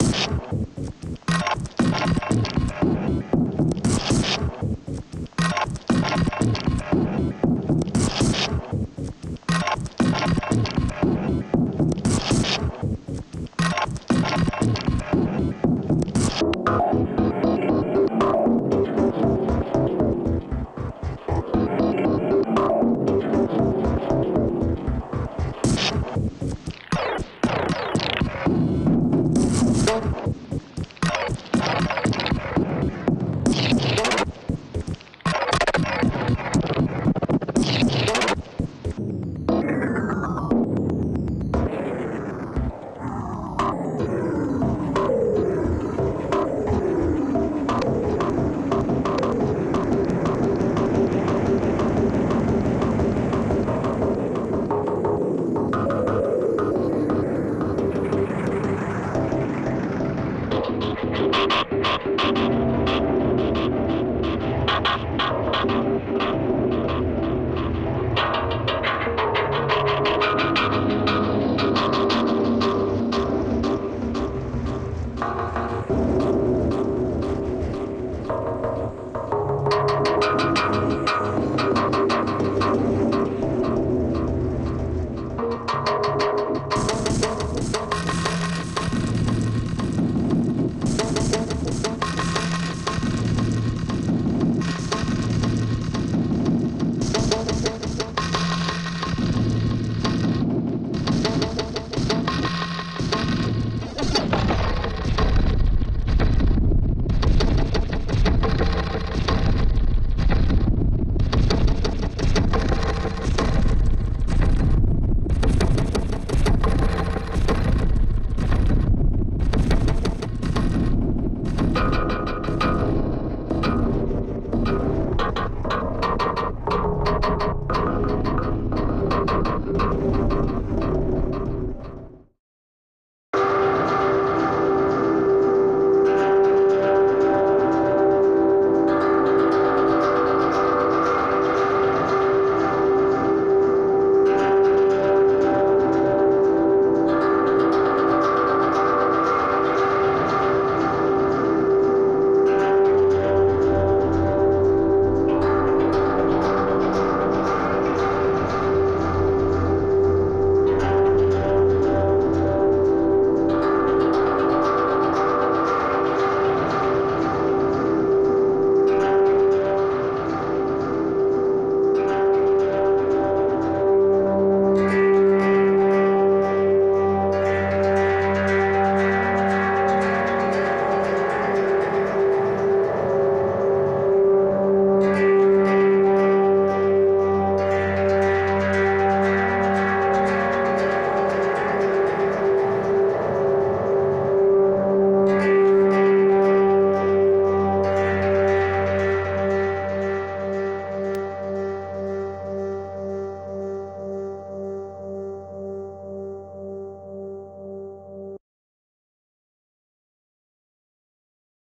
Tokyo - Drum Loop 1
Made from recordings from a drum museum in Tokyo. It's a one bar loop with effects thrown at it. Done in 2009 so I've idea what I did but has resonators, delays, distortion etc and lots of compression. Starts off relatively straight forward, gets dubby then ends up a drone. Recorded on a Zoom H4, mixed in Ableton Live. 117bpm
asian, delay, drum, dub, effect, japan, loop, percussion, sample, tokyo